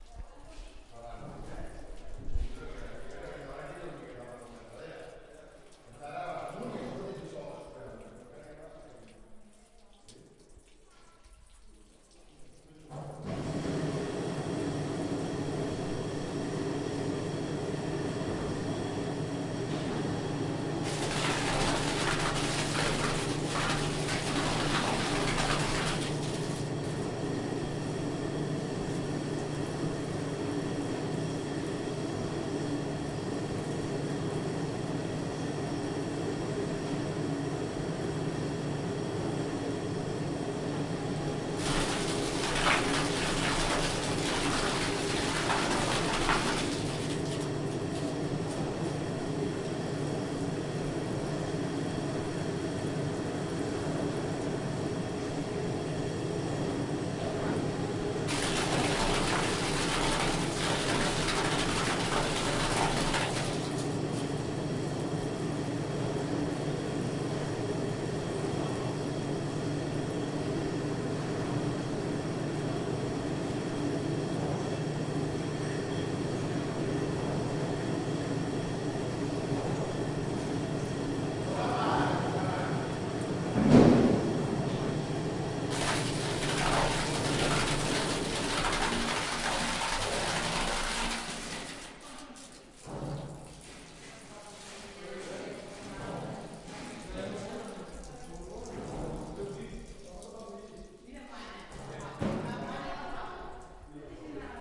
Recording of machine sound in a winery when pumping the processed the grapes. Recorded using a Zoom H4.
machine, pump, winery